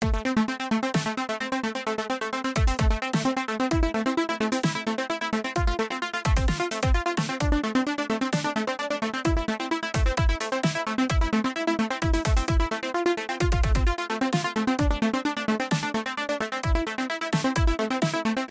short synth clip that can be used as a loop
electronic, Game, loop, synth, techno